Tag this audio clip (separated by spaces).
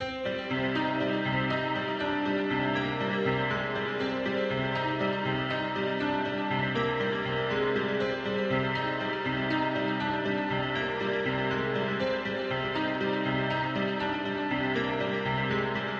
120BPM dark dreamlike echo key-of-c loop loops piano psychedelic reverb